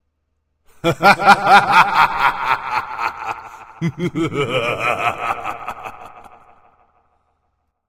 Varying Maniacal Laughter